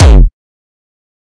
Distorted kick created with F.L. Studio. Blood Overdrive, Parametric EQ, Stereo enhancer, and EQUO effects were used.
drum, synth, trance, distorted, hardcore, bass, beat, hard, drumloop, progression, kickdrum, kick, melody, distortion, techno